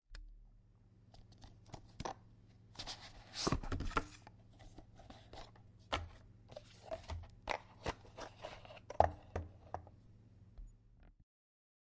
Unpacking of my atomiser